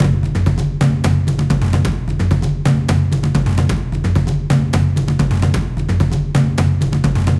african loop001 bpm130
african, loop, percussion